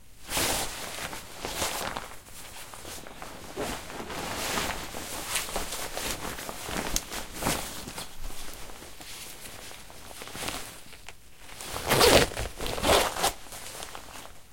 The sound of me pulling on a jacket.